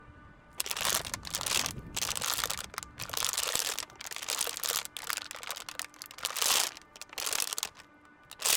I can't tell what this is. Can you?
water bottle crunch
crushing a water bottle
narrative, bottle, sound